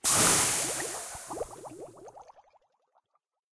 Getting poisoned by an evil mushroom. Sound made for a cancelled student game.